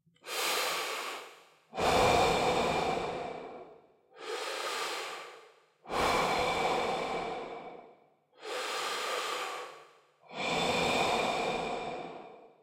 Just me breathing in and out with some reverb
breath, inhale, exhale, breathe, breathing, air